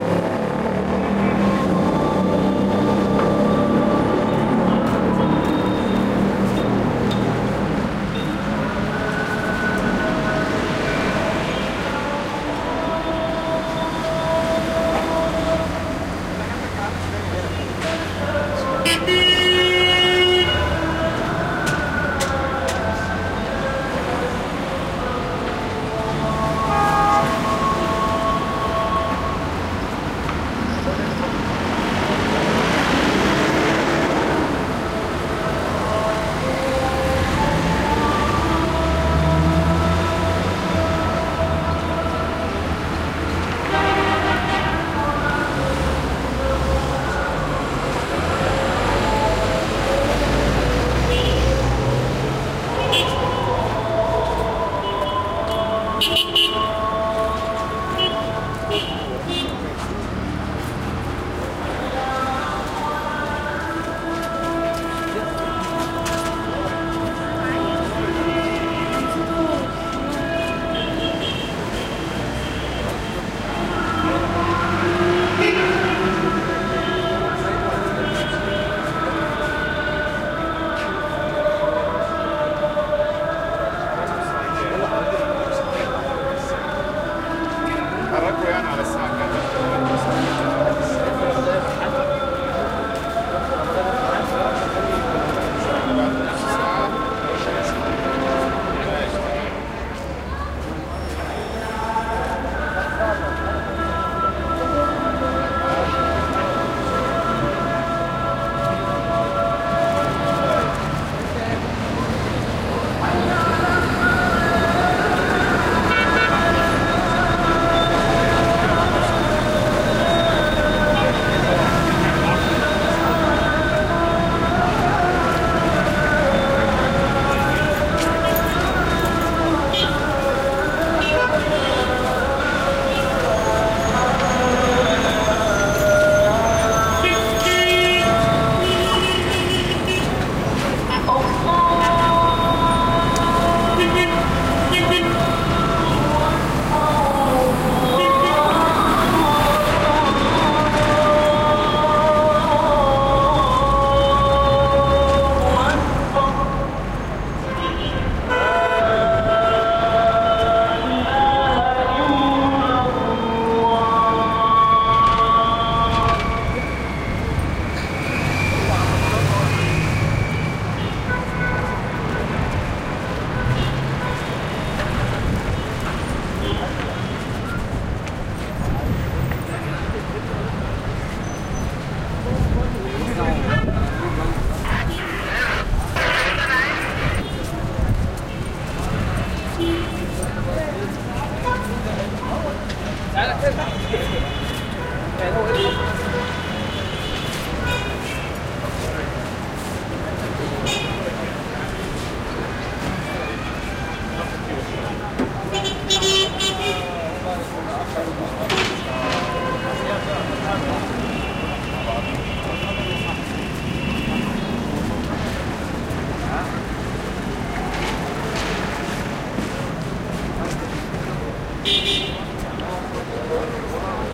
The streets of Cairo